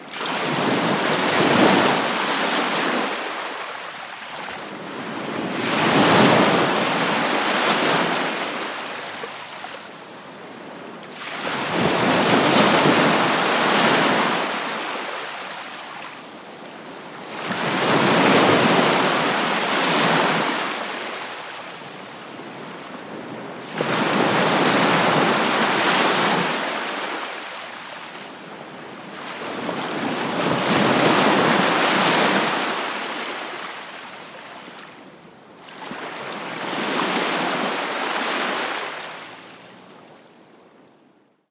water baltic sea waves heavy frequent close perspective mono
This sound effect was recorded with high quality sound equipment and comes from a sound library called Baltic Sea which is pack of 56 high quality audio files with a total length of 153 minutes. In this library you'll find various ambients recorded on the shores of the Baltic Sea.
baltic, atmosphere, waves, heavy, splashes, whoosh, sea, noise, watery, ambient, wave, atmo, location, swell, water, ambience, splash, soundscape